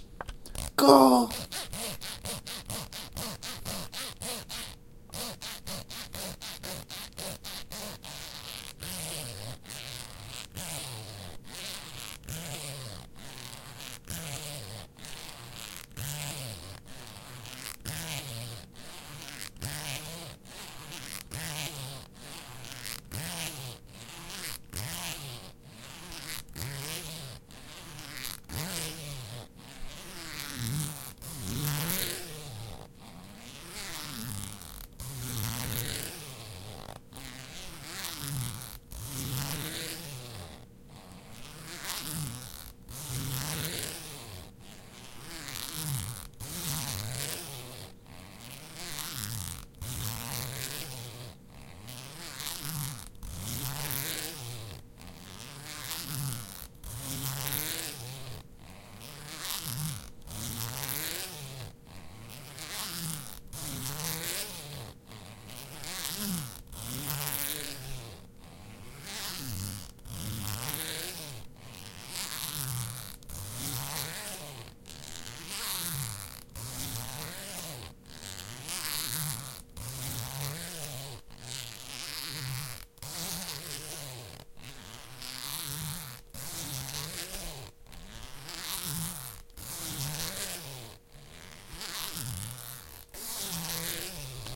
Zipping a zipper repeatedly. Recorded using H4N.
zipping, zipper, repeated-zipping